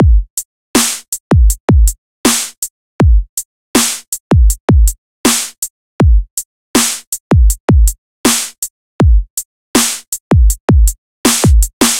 Loop 160 BPM 8 BARS
snare, house, glitch, bpm, kick, dubstep